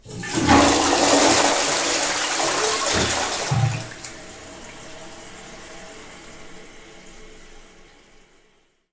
Toilet Flush 02
to flush the loo